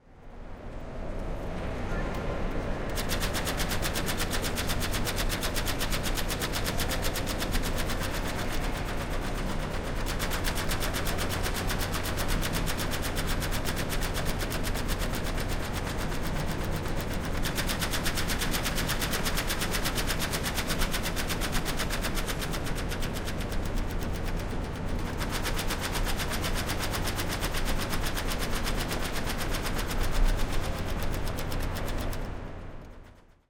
Mechanical alphanumeric flapboard signs have long been a standard fixture of airports and train station waiting rooms, but the technology is rapidly disappearing in favor of more modern (if also more soul-less) electronic displays. Also known as "Solari Boards" (most were made in Italy by Solari di Udine), the signs' familiar "clack clack clack" rhythm is sufficient to trigger an almost Pavlovian reflex in seasoned travelers the world over. This 34 second sample was captured on September 20, 2006 in the main waiting room of Amtrak's Philadelphia, PA (USA) 30th Street rail station as the train status board was being updated. The recording contains considerable ambient room and rush-hour crowd noise in the stereo mix. Equipment used was a pair of MKH-800 microphones in a mid-side arrangement (hyper-cardioid and figure-8) and a Sound Devices 744T digital recorder. This sample has been mixed to conventional A-B stereo.
flapboard, flap, board, field-recording, sign, solari, airport, waiting-room, train-station